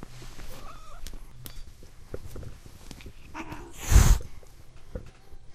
Puk isn't too happy about being removed from the bed.
cat, animal, hissing, zoom-h2